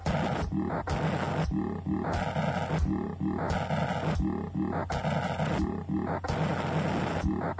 Off of my DD 20 I encountered this Random "Alieatron" effect it was kind of scary...
circuit, freaky, glitch, bent, techno